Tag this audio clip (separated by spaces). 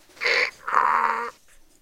animal bray donkey farm